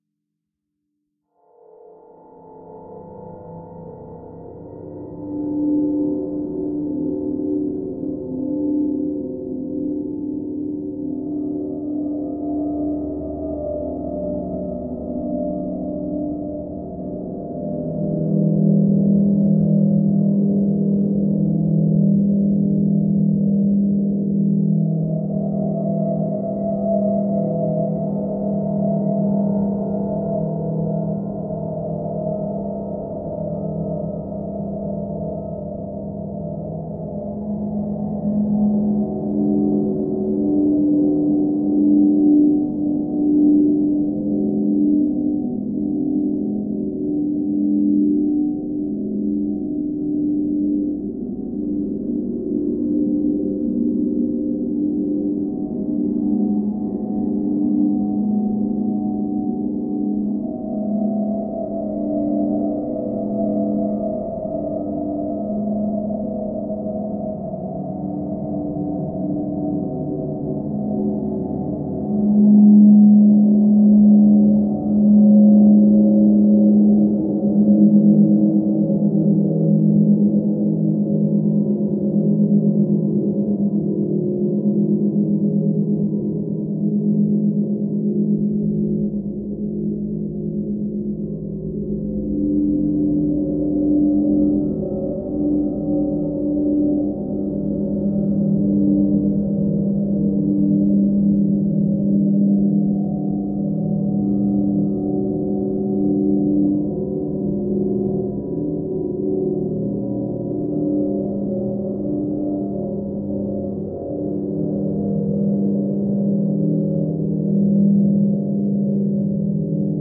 archi soundscape space4
ambiance, ambiant, ambience, ambient, atmosphere, drone, horror, outer-space, scary, soundscape, space, synth, synthesized